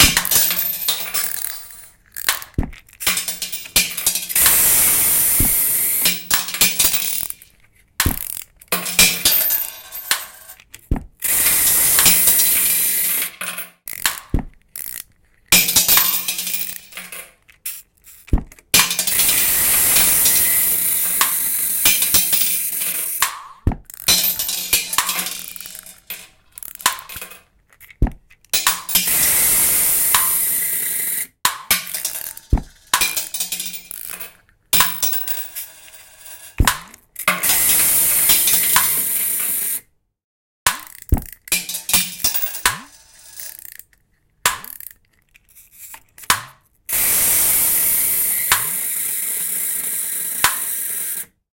String Mouse
mouse, string, toy